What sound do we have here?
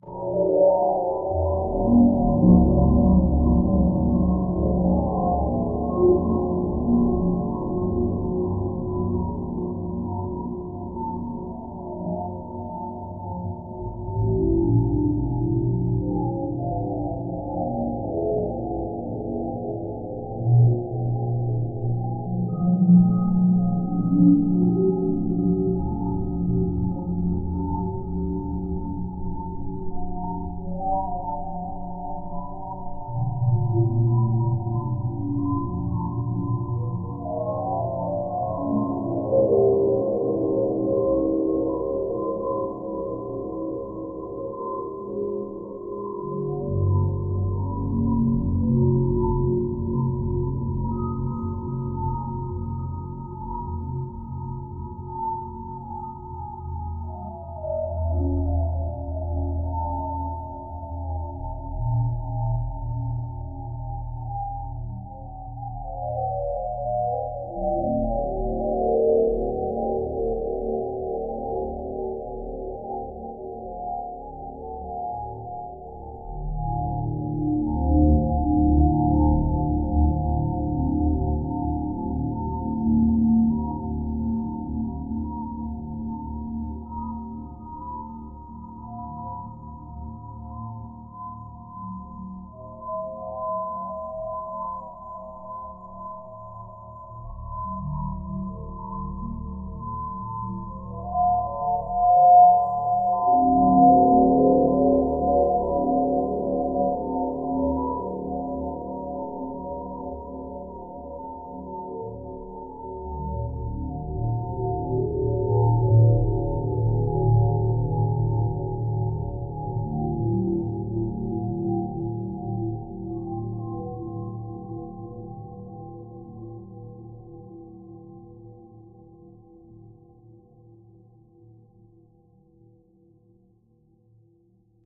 In the Deep Blue Sea
A quiet ambient soundscape with a feeling of drifting underwater as indistinct shapes float by.